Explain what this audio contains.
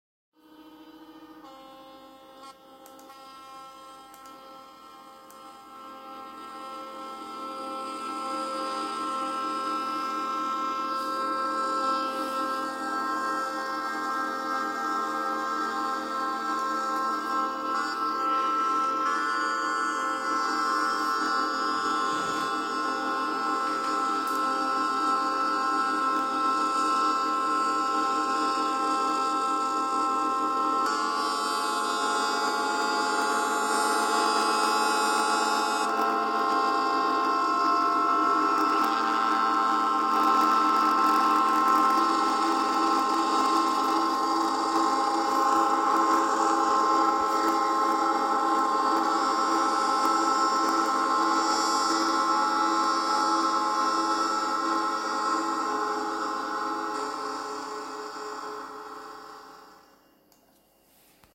Sirens sound
The sirens of the in the port of Geneva to announce the raising of the last part of the span of the new bridge Ponte Morondi 28 of April 2020
siren, civil, warning